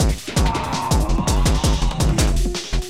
Loops and Such made from the Stickman DiSSorted Kit, taken into battery and arranged..... or. deranged?
like, processed, treated, metal, stickman, ni, remixes, beat, distorted, harsh, drums, heavy